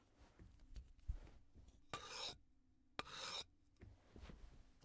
Just someone scraping wood
Scraping, friction